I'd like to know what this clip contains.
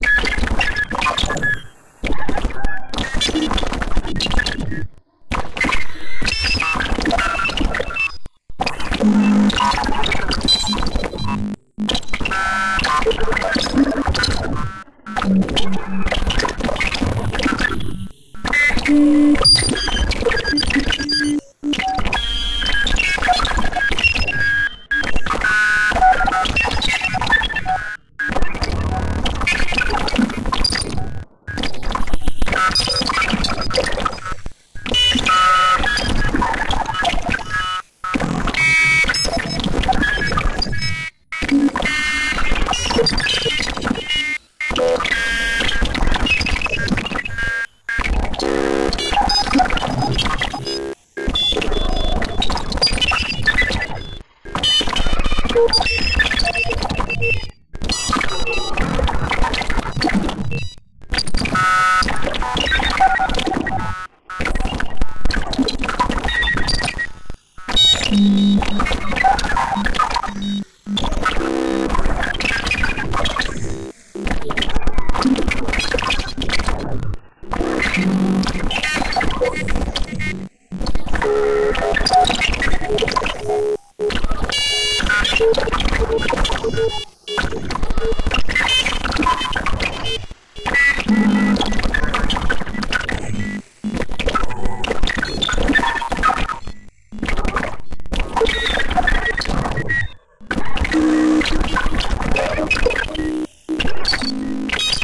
Created a module chain with the intention of generating something "inhuman". "weird sounds" initial render, "weirder sounds" adjusted with additive synth elements toned down, "weirdest sounds" I realized I could open a portal to hell by focusing on one modulation path after the previous adjustments.